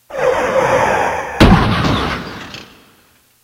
Car Accident with Squeal and Crash
Tires squeal and a terrible impact is heard. What a terrible accident. Short, simple and completely bitter-sweet. Talk about coming to a complete stop?! This is what that sounds like.
Recorded with the Yamaha YPG-525 keyboard using the sound effects that were provided using Audacity and a little creativity.
No acknowledgement is necessary, but most appreciated.
Thank you and have fun!